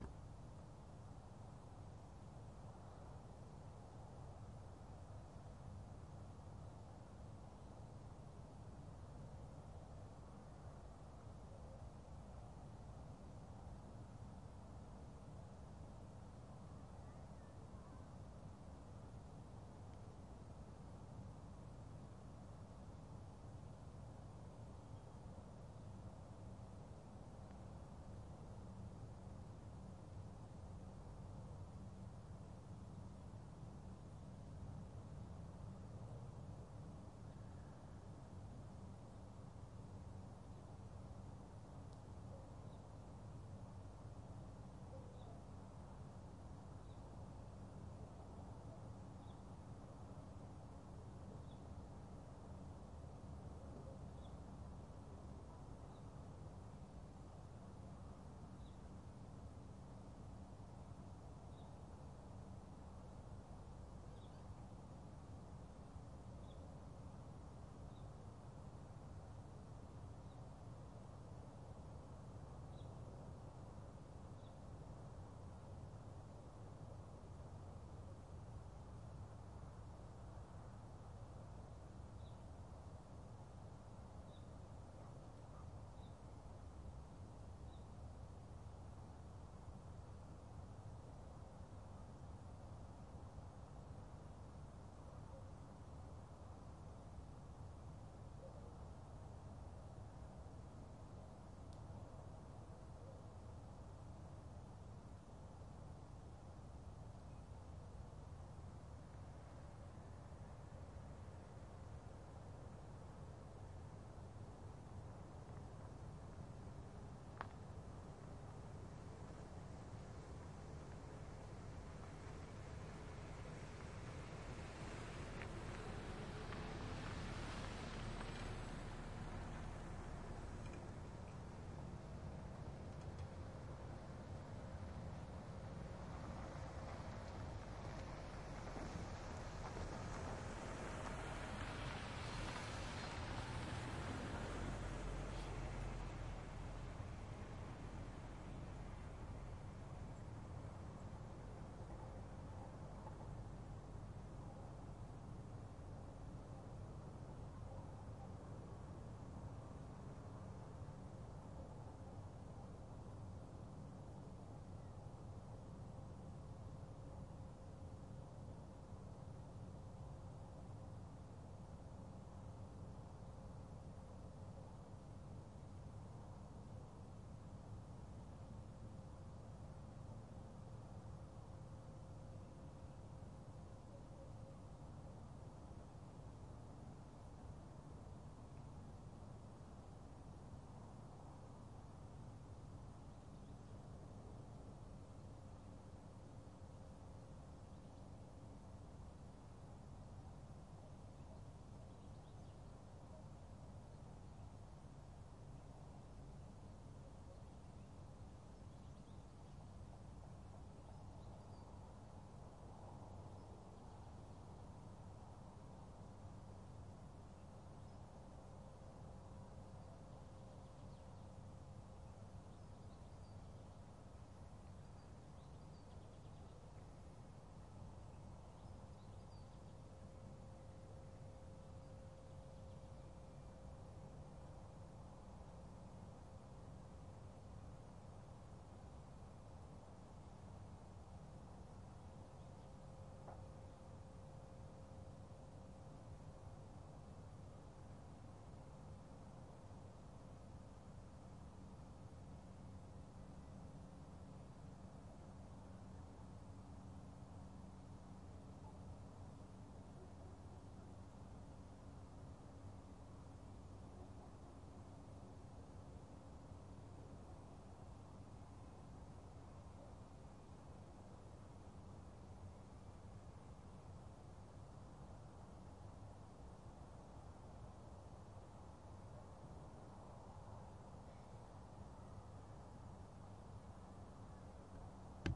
Quiet ambience far from city (2)
This sound is recorded far from Yaroslavl city, on the other coast of Volga river. Nothing happens, evening atmosphere, little bit birds, some cars driving slowly. Distant dogs.
raw, russia, ambience, atmosphere, soundscape, background-sound, suburban